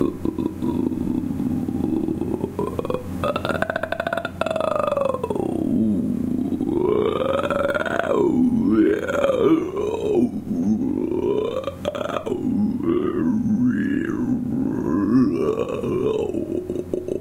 26.01.2015 - 22-00 UHR - PSY-TRANCE A CAPPELLA
These samples were made with my H4N or my Samsung Galaxy SII.
I used a Zoom H4N mobile recorder as hardware, as well as Audacity 2.0 as Software. The samples were taken from my surroundings. I wrote the time in the tracknames itself. Everything was recorded in Ingolstadt.